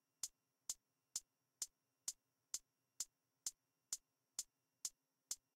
FRT CH 3130
Hi-Hat modular morph